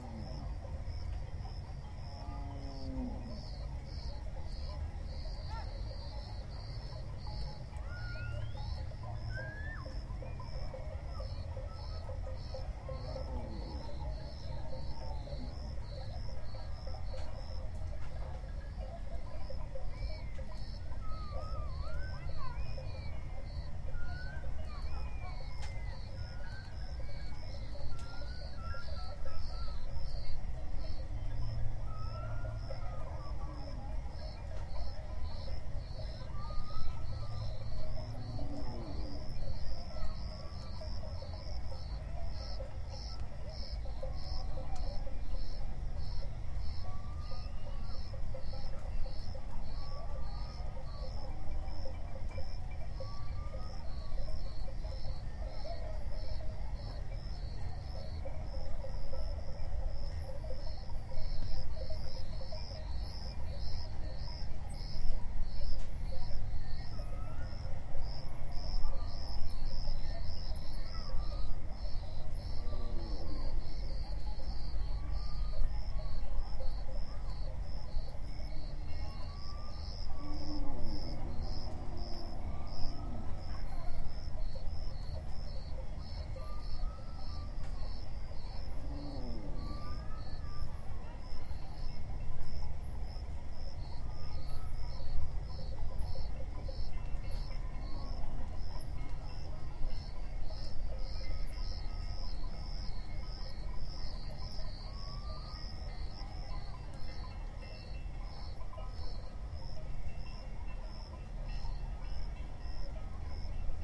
African savanna 2
Midnight under full moon in Masai Mara National Park, Kenya.